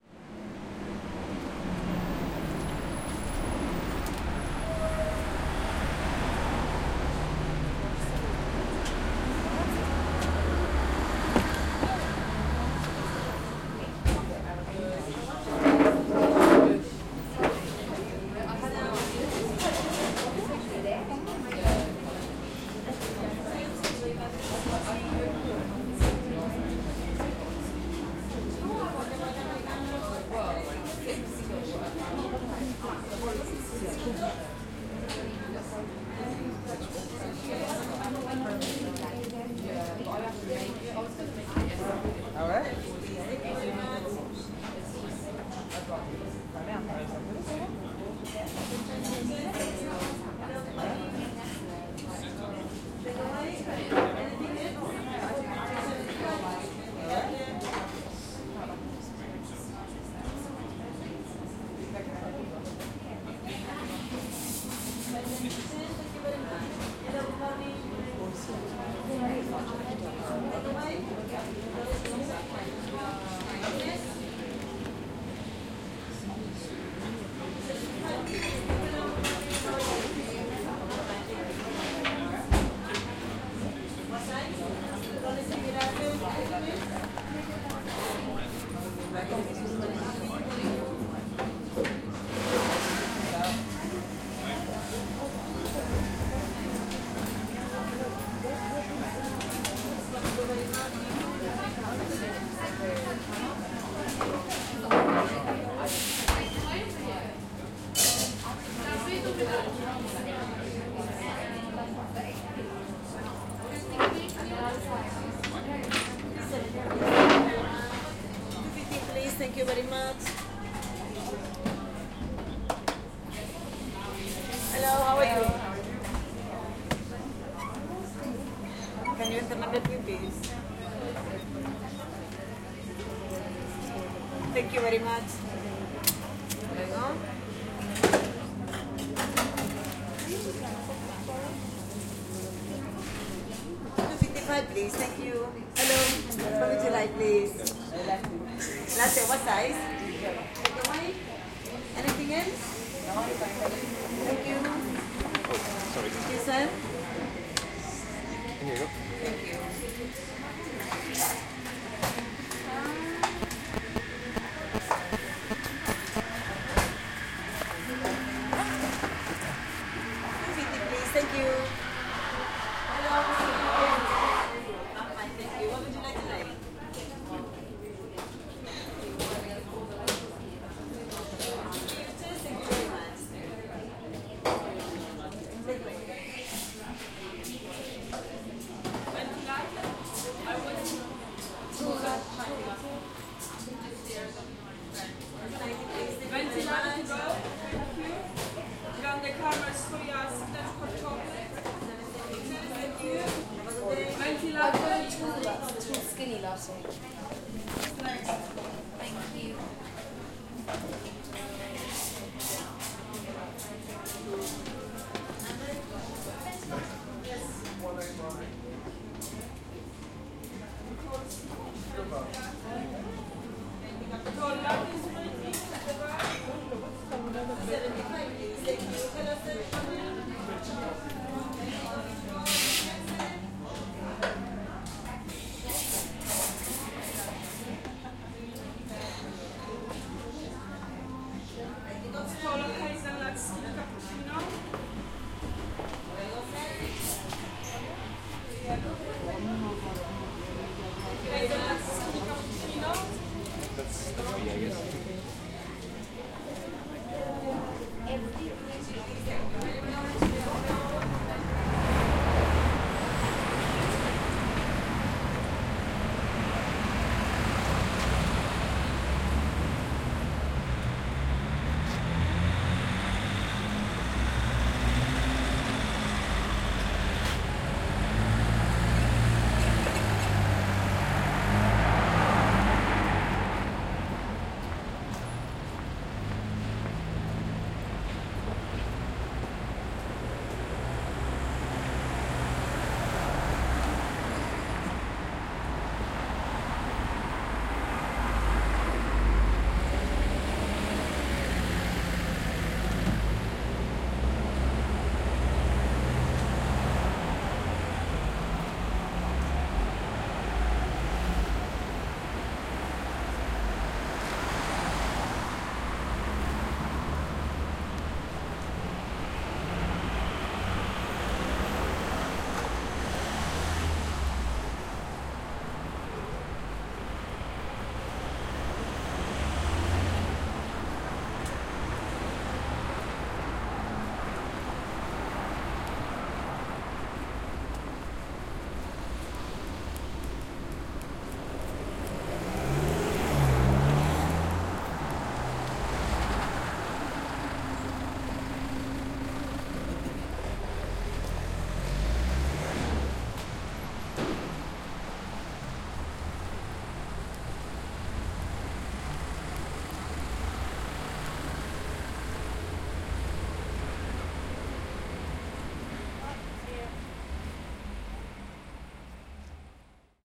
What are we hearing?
The recording begins with street sounds, bus passing etc, then going into Starbucks cafe, medium crowd, industrial coffe maker sounds on the background, eventually going out to the street and walking for a bit - traffic sounds.
As I needed to move around inside the cafe, the sound's features and characteristics change over the recording, you might find some specific partsof it more usable than others.